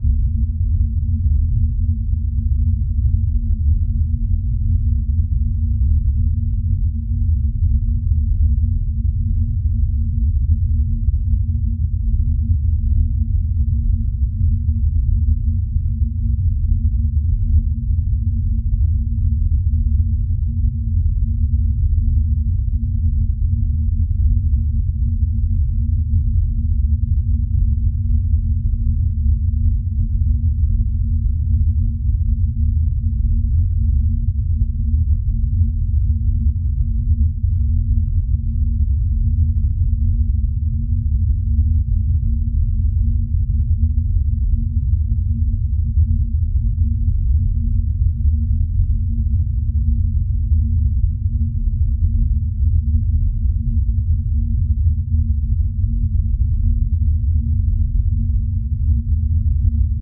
Moving Low Frequencies sound fx.
ambient, atmosphere, creepy, dark, deep, depth, design, dive, diving, effect, frequencies, fx, horror, low, pad, sfx, sound, soundscape, sub, submarine, subwoofer, underwater